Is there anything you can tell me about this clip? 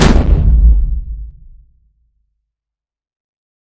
Seismic Slam
A fairly harsh and slightly clipped sample of foley artistry to create a heavy "shockwaving" impact.
You may notice that this is the layering sound of Skewer Slam.